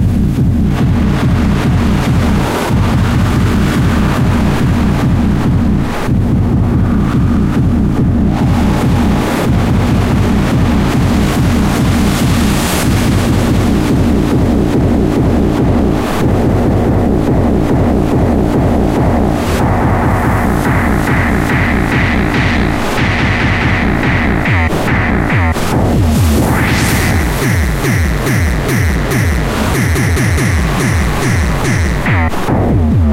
Rhythmic Noise 4 Rumbling Suspense
Rhythmic Noise 3, with a filtered Hardcore Gabber Kick on the background.
bassdrum, beat, destruct, destruction, digital, error, filter-automation, filtered, filter-sweep, filter-sweeping, gabba, gabber, hardcore, interference, kick, kickdrum, noise, noisy, pain, painfull, rhythm, rhythmic, rhytm, scraped, scraping, sidechain-automation, sidechain-compressed, sidechain-compression, sidechain-compressor, static